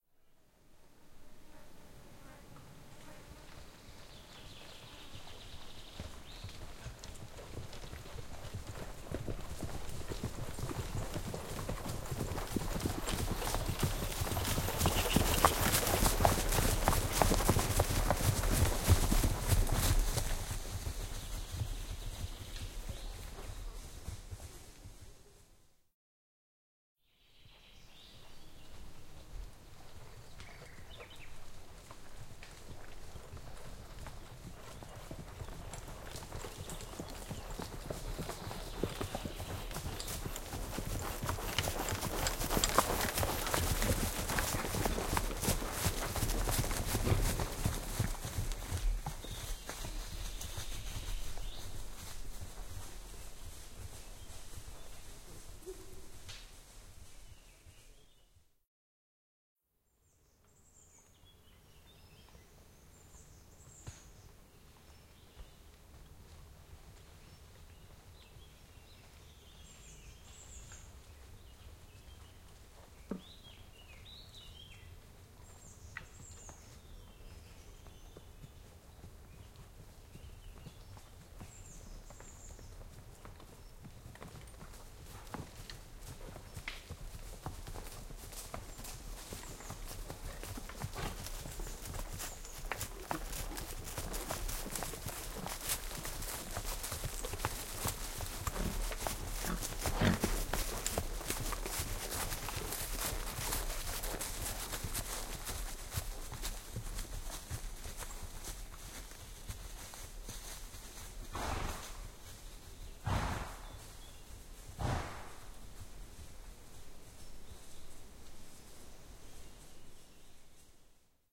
Field recording of a small group of 4 horses walking in the forest. approach, pass by, three times. France, Gers. Recorded with MS schoeps microphone through SQN4S mixer on a Fostex PD4. decoded in protools